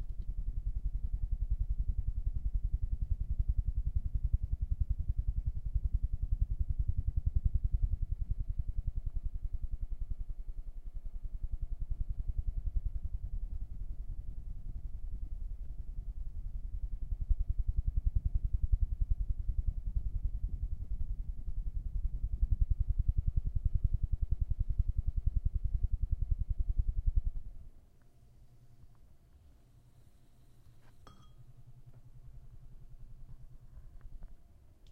helicopter fx
some sounds from my fan today with the h4n
helicopter, fx, sounds